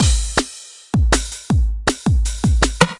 Drums loop 160BPM
160bpm, drums, loop